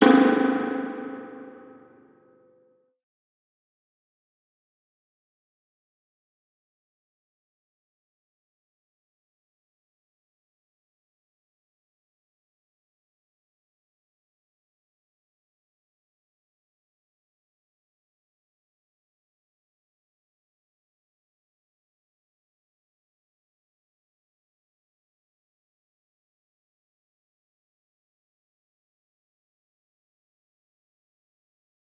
Synth Spring
percussive sound processed with a spring reverb impulse